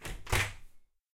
Door Handle 05
Office door. Recorded with Zoom H4n.
open, field-recording, handle, Door, close